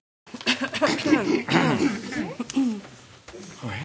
Men and women on stage exaggerate clearing their throats before singing. Recorded with stage mics.